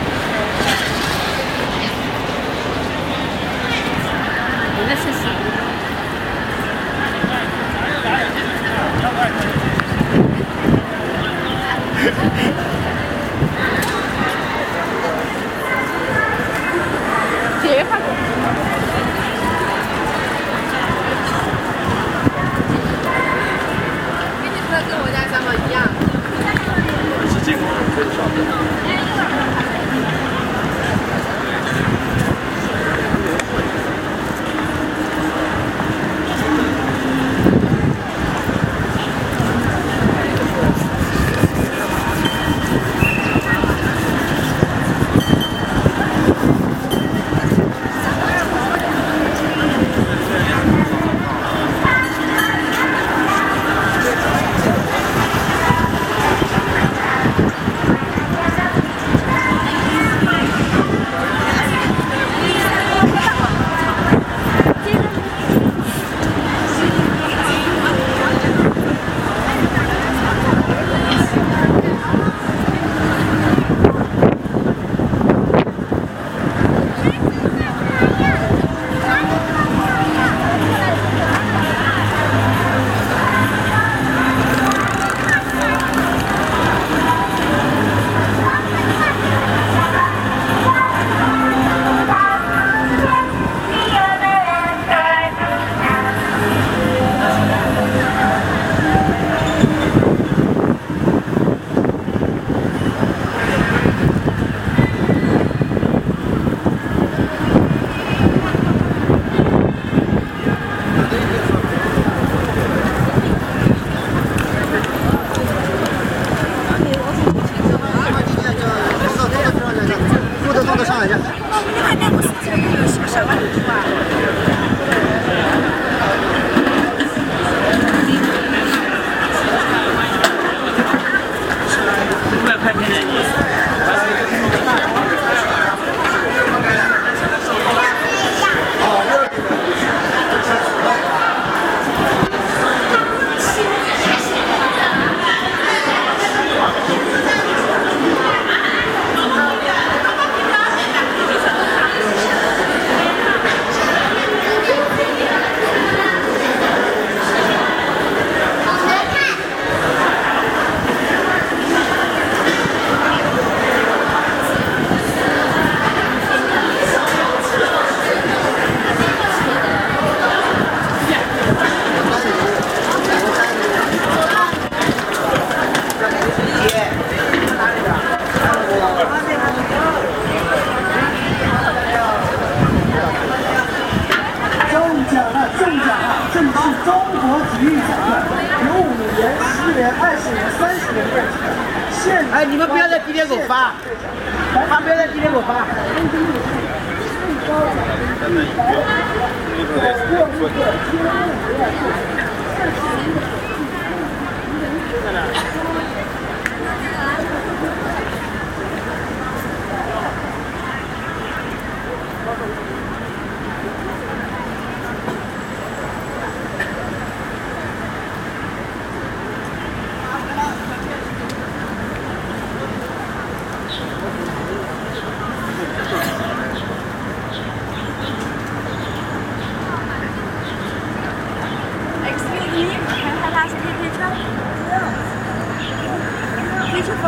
Walk from Nanjing Road East To Peoples Square in Shanghai. Recorded on a Canon D550 camera.